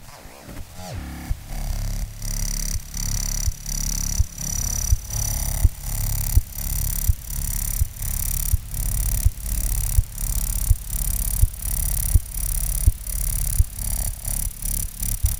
Rhythmical interference generated from a No-Input Mixer
Feedback, Interference, Loop, Mixer, No-Input